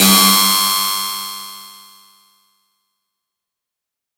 Blips Trails: C2 note, random short blip sounds with short tails from Massive. Sampled into Ableton as instant attacks and then decay immediately with a bit of reverb to smooth out the sound, compression using PSP Compressor2 and PSP Warmer. Random parameters, and very little other effects used. Crazy sounds is what I do.
noise, sci-fi, techno, house, processed, effect, synthesizer, hardcore, glitch-hop, porn-core, acid, lead, club, bpm, dark, rave, bounce, electro, resonance, trance, dance, electronic, sound, 110, random, synth, dub-step, blip, glitch